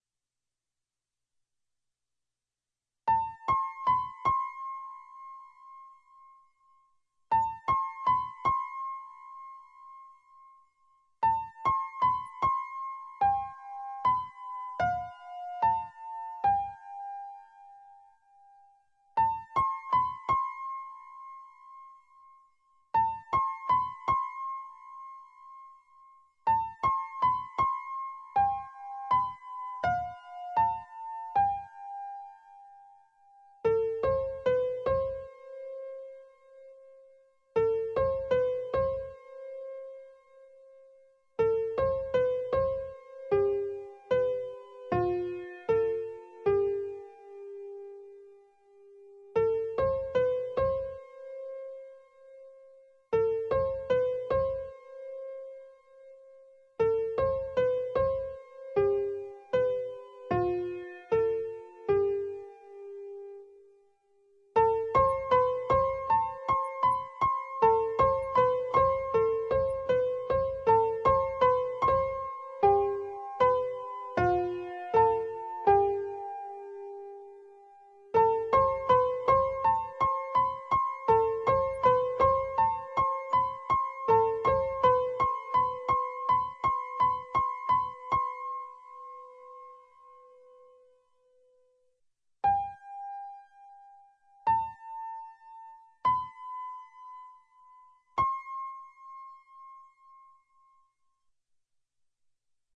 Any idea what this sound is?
this is a sad song i composed years ago. I played it on my keyboard.